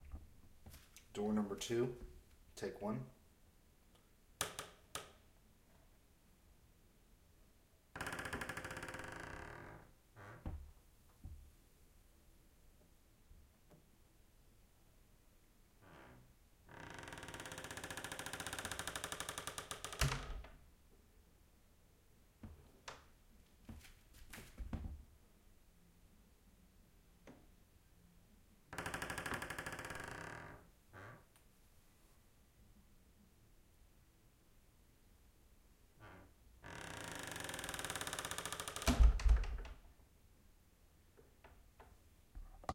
AAD Door Creak 2 - 1
This is one of our raw recordings no treatment. This is an M-S Stereo recording and can be decoded with a M-S Stereo Decoder.
Close, Closing, Creak, Creepy, Door, House, Old, Open, Opening, Squeak, Wood, Wooden